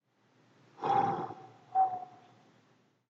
Sonido de apagar una mecha.